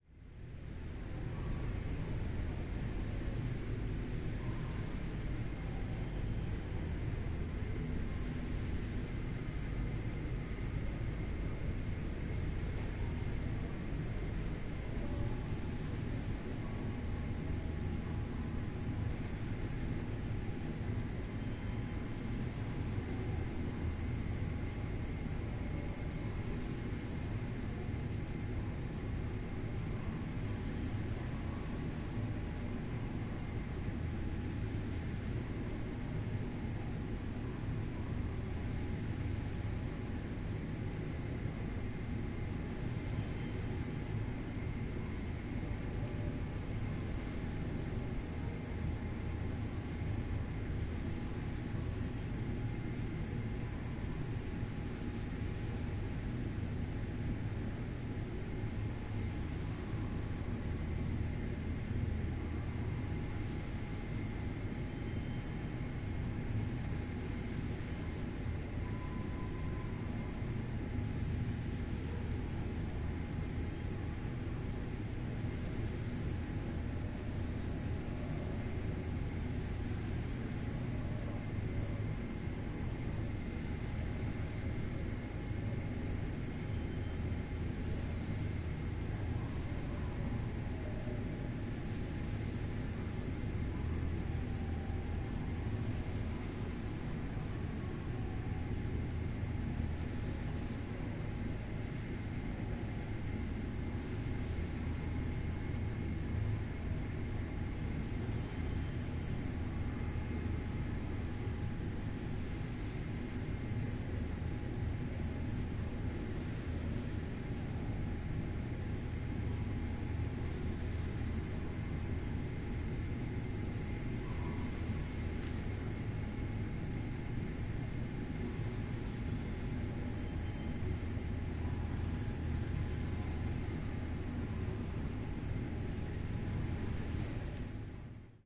110810-oure at night
10.08.2011:eleventh day of ethnographic research about truck drivers culture. Night ambience one of the corridor in the social building used by fruit-processing plant. Oure in Denmark.
buzz, factory, field-recording, inside, oure, reverbation, silence